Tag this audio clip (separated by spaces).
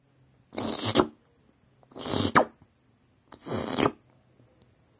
tongue lick cartoon